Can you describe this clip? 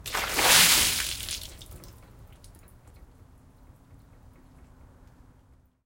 Request from Bulj for a bucket of 'water' poured over someone's head.
First version. I had to record this outside - so there might be a little outdoor ambi.
Plastic hobby horse stood in for the victim. Water poured from plastic bucket... I didn't realize until after I transferred it, that the horse rocked a bit after dowsing, whoever uses this sample ought to cover the sound with the utter shock and flailing body of the victim.
Recorded with a Sony ECM-99 stereo microphone to SonyMD.
environmental-sounds-research, splash, water